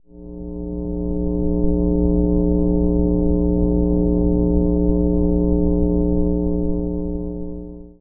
HF Computer Hum A
The humming of my computer! How exciting!